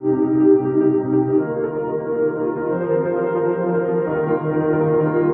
hg piano loop creator kit 90 bpm 8 beats 000
90 bpm 8 beat loop.